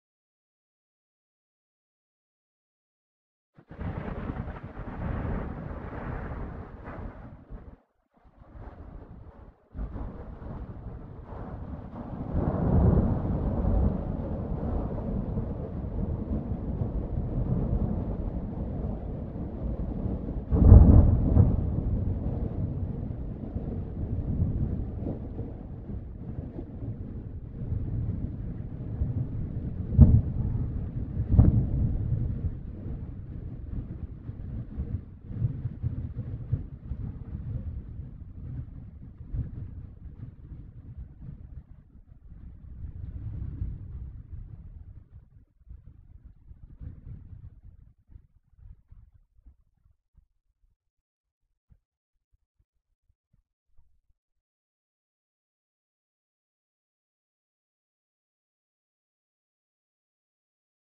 24may2010loudestthunder
This is the loudest thunder from the storm on 24th May 2010 in Pécel, Hungary. I recorded it with MP3 player and denoised.
lightning, storm, thunder, thunderstorm, weather